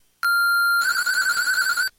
sample of gameboy with 32mb card and i kimu software
boy, game, layer